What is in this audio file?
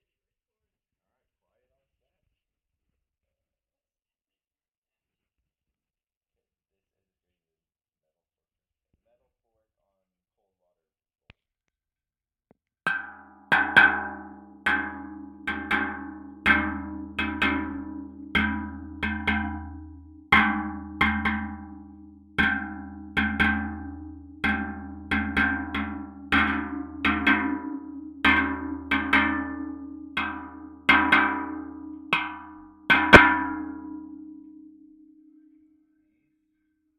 This sound was made with a metal fork hitting a metal pot filled with water. Microphone was a hyrdophone and a Sound 633 Mixer.
steel, metal-on-metal, unearthly, loud